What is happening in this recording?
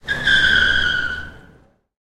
Tires Squeaking
Rubber tires breaking and squeaking on the streets of Hilversum, Netherlands. Recorded with Rode NT4 XY-Stereo Mic and Zoom H4 Handy Recorder.
bike, braking, breaking, car, moped, rubber, screech, slide, slipping, squeaking, squeaky, squeeking, squeeky, tires